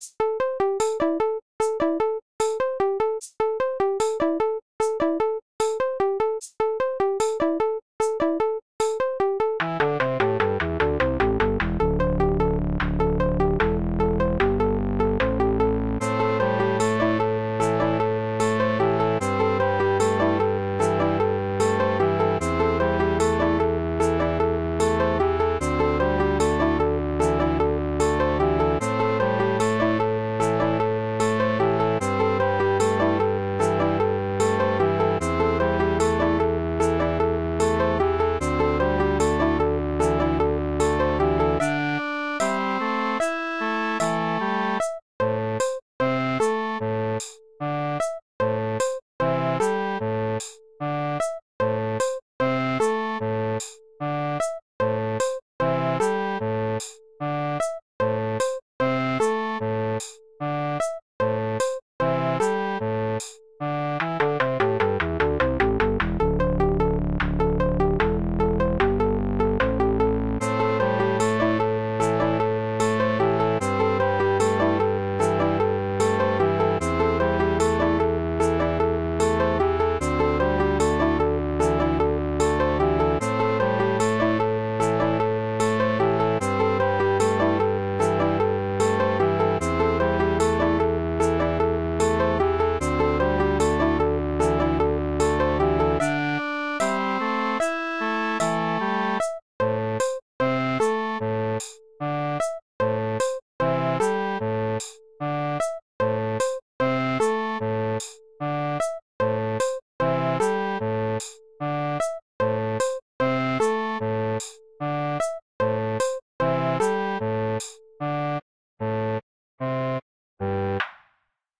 Game music Time of action
adventure, ambience, ambient, atmosphere, background, calm, game, loop, melody, music, relaxing, retro, soundtrack